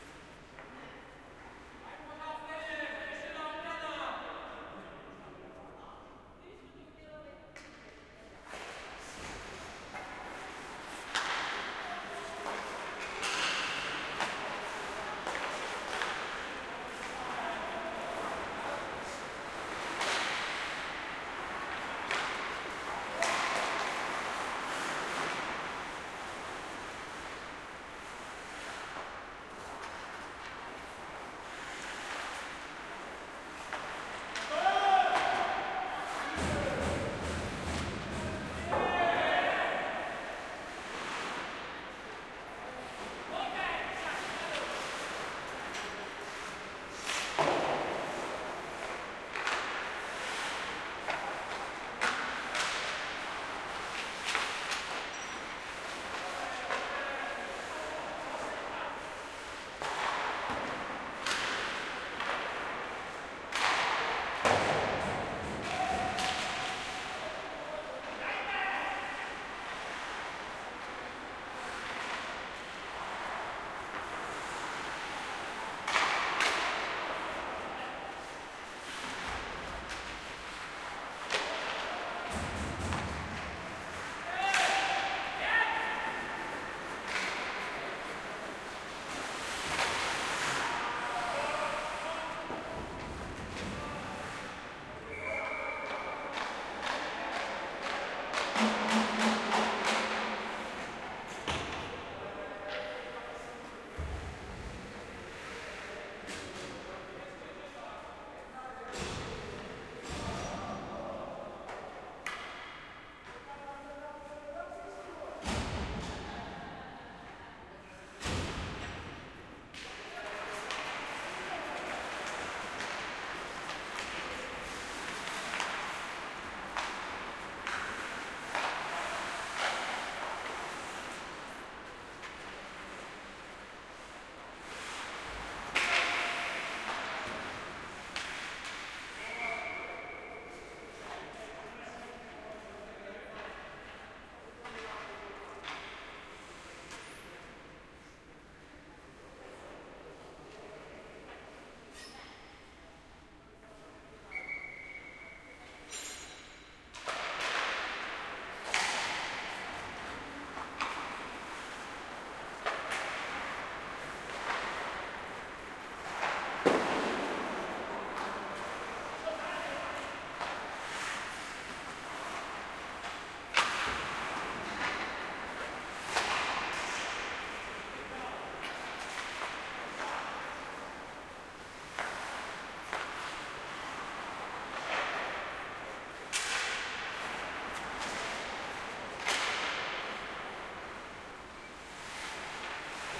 Hockey game behind goal wide 2
Empty hockey stadium where a few locals play a regular game.
skating, players, Ice, shouting, hockey, stick, cracking, man